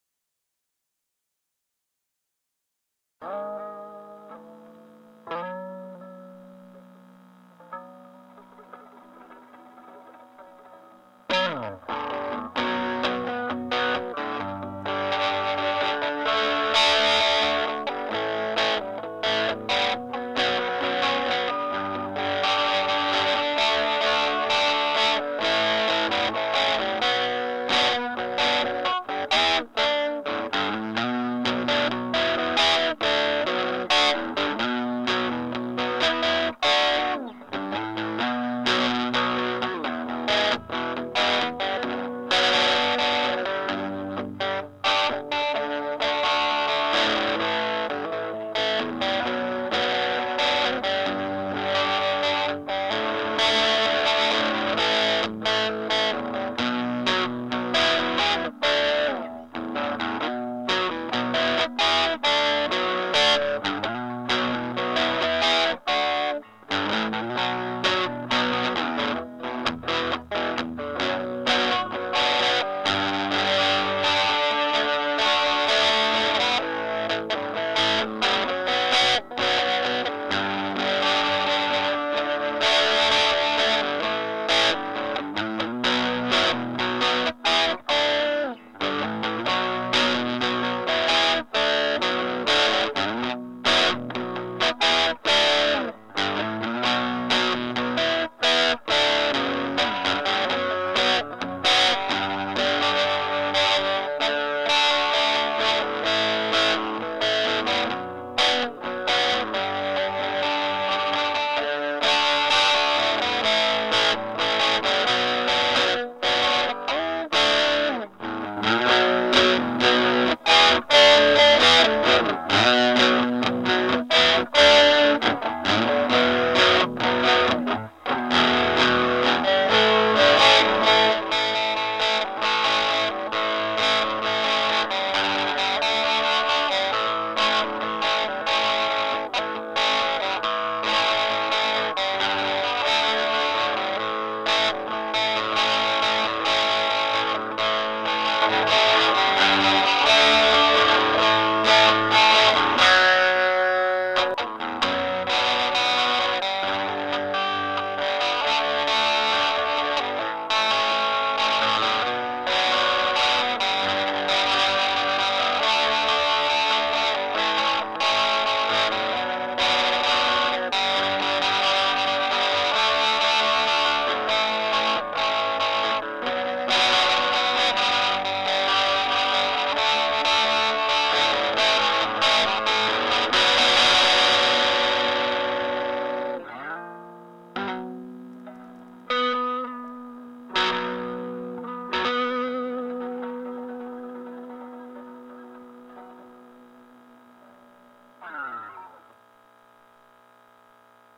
Pretty man
this a rock guitar thread enjoy
guitar, rock